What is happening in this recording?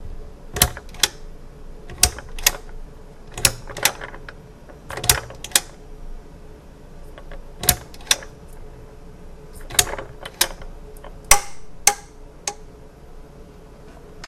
lamp on:off
turning off and on a lamp with a pull-string